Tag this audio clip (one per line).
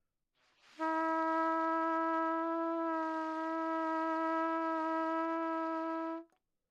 E4
good-sounds
neumann-U87
single-note
trumpet